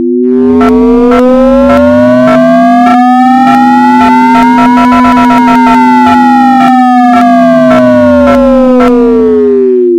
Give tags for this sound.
sinusoid whistle

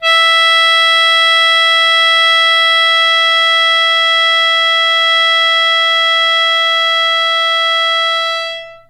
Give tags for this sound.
acoustic instrument melodica

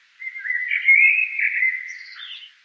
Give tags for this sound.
processed blackbird bird nature

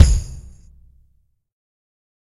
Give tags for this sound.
Bass Clap Dance Drum EDM Electro FX House Kick Loop Minimal Nova Percussion Propellerheads Rhythm Sound TamboLarge TamboRock Tambourine Techno

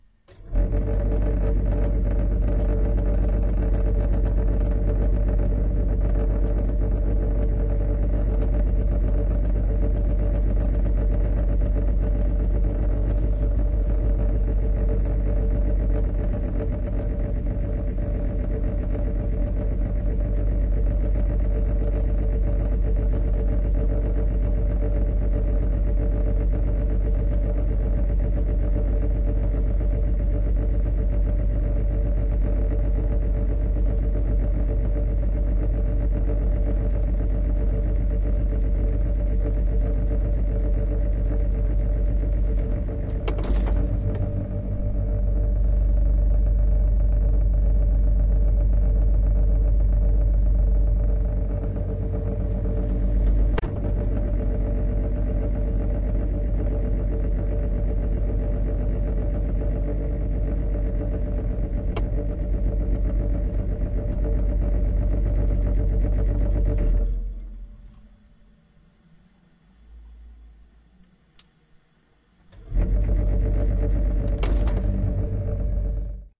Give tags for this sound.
electric mechanix

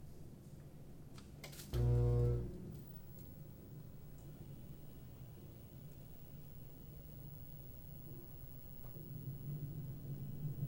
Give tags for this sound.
broadcast television televison TV

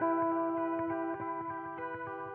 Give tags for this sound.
electric guitar

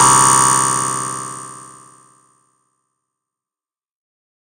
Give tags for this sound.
110 noise synth glitch trance techno rave house resonance dark lead random bpm dance bounce

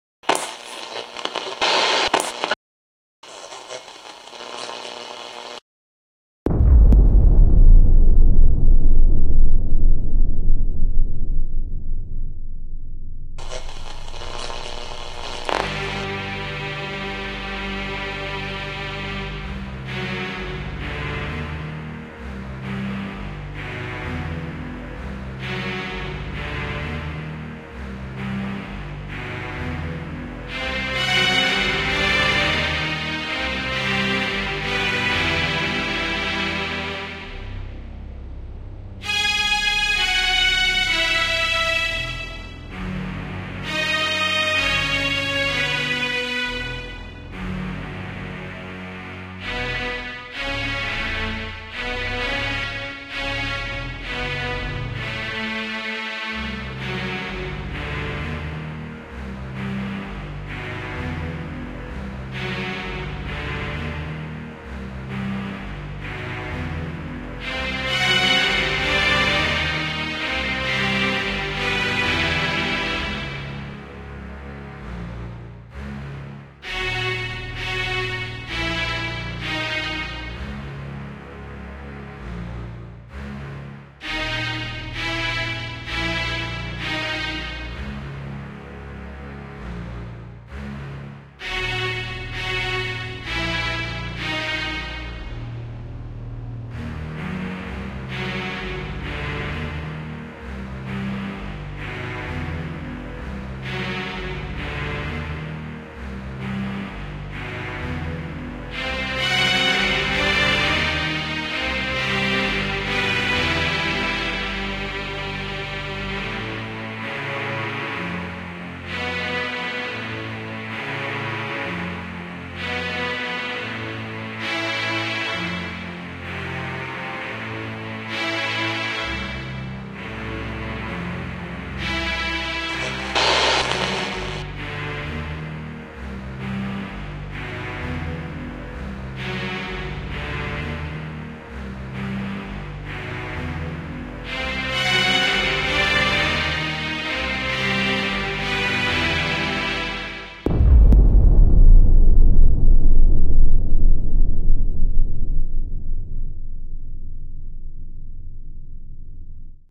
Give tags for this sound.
sounds radio star SUN future space wave

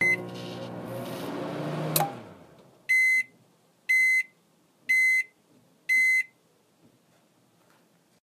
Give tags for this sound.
appliance appliances beep beeping complete field-recording finish kitchen microwave microwave-oven

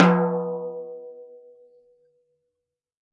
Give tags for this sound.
1-shot multisample drum velocity tom

